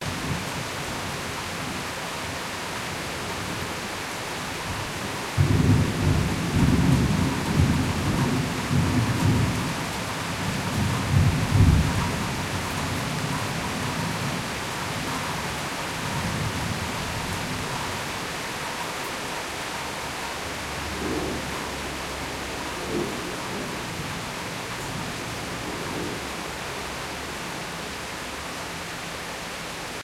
Rain and thunder 2
Heavy rain and thunder